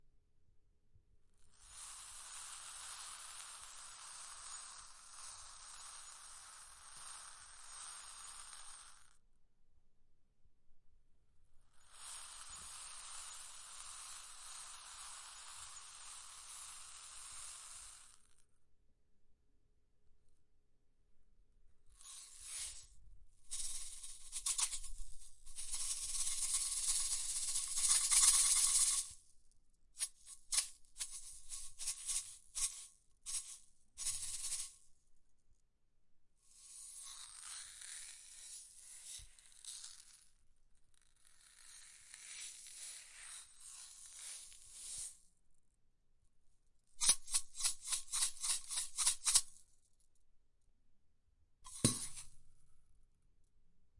Took a little container of very tiny beads and rolled it on the carpet and shook it in my hand to get different sounds. Recorded in my bathroom using my Zoom H4n with it's built-in mics. Fairly close XY array at 90 degrees.